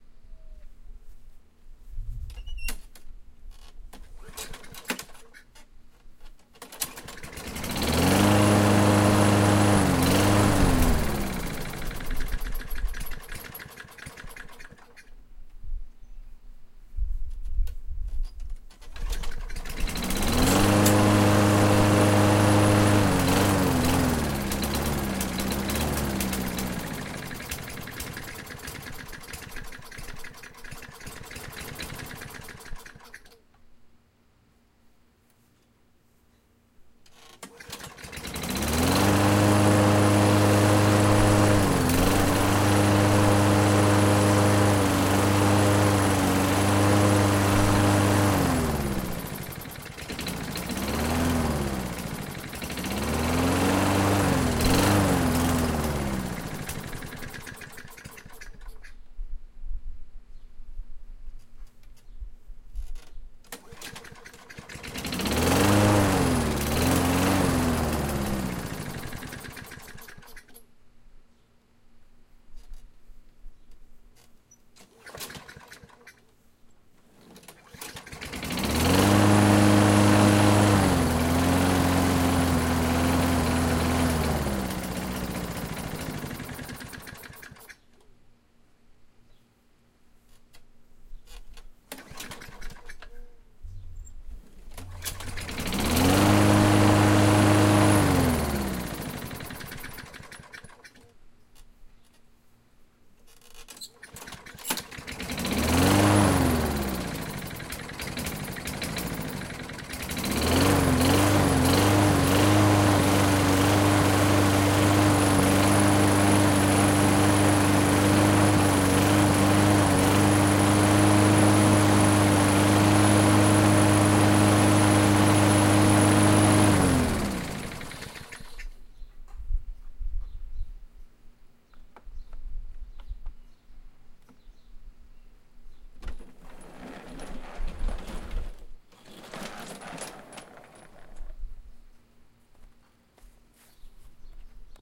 Raw lawn mower recording. Lawn mower dies due to bad gas. Elements were used in a Dallas production of Alan Ayckbourn's "House and Garden". Recorded in my back yard with Zoom H4
engine, mechanical, motor